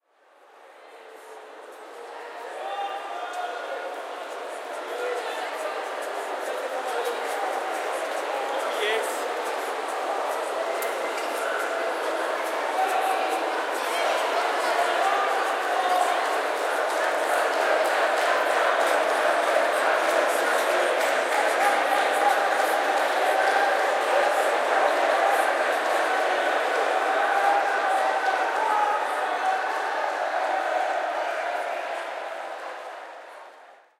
Crowd Cheering - Ambience
A sound of a cheering crowd, recorded with a Zoom H5.
event, sports, hall, crowd, big, cheer, cheering, concert, people, entertainment, stadium, games, loud, audience